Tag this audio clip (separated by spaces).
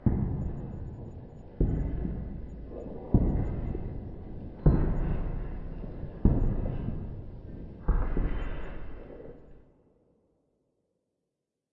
concrete feet foot footstep footsteps running step steps walk walking